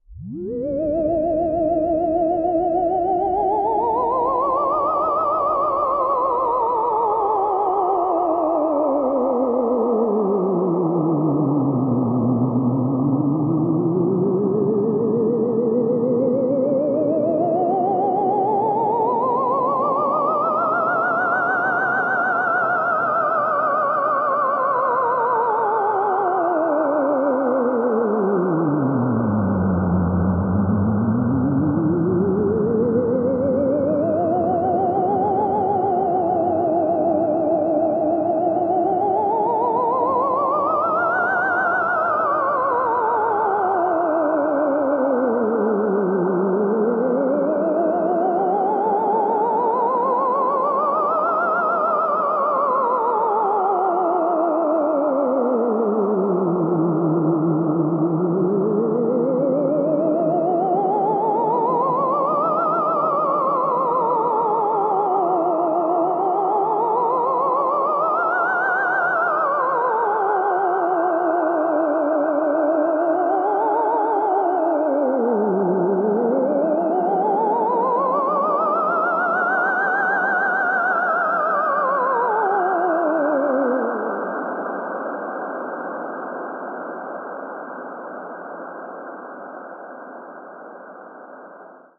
ghostly horror haunted scary creepy ambient sound, good to use for Halloween event